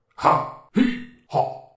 RobotGrunt full
Three grunts from a male sounding voice.
All 3 grunts are available individually if you want to create your own loops.
cyborg, gibberish, speech, voice, grunt, male, robot